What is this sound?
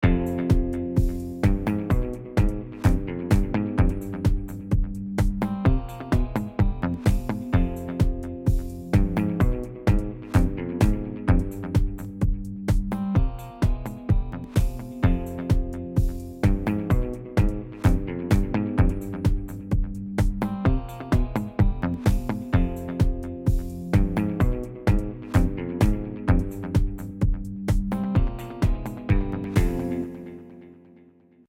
sneaky guitar (loop)
guitar, sneaky, fl-studio